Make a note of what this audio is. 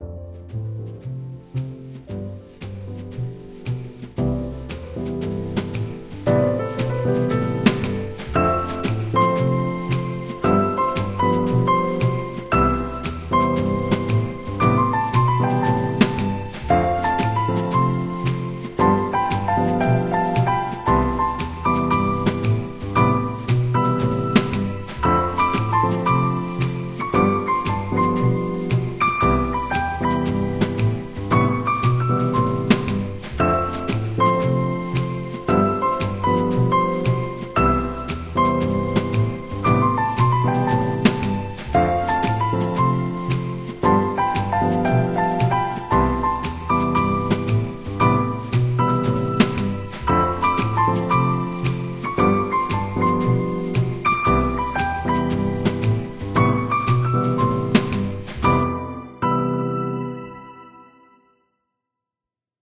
Jazzy Vibes #81 - Jazz Piano Medley
Background, Bass, Brush-Drums, Chill, Double-Bass, Drums, Grand-Piano, Happy, Instrumental, Jam, Jazz, Jazz-Band, Jazz-Piano, Jazzy, Keys, Lounge, Major, Mellow, Melody, Mood, Music, Piano, Relaxing, Smooth, Soundtrack